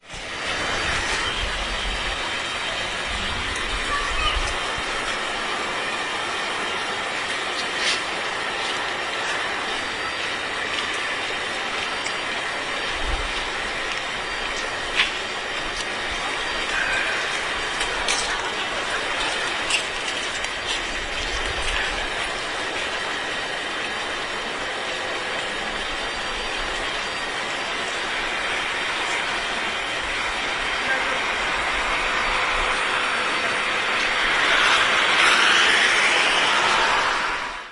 20.08.09 about 7.00 a.m. Dluga street in the center of Poznan.
swoosh of hoover: I was recording from the street. somebody was hoovering the flat on the groundfloor (old building from the beginning XX century). the window was open.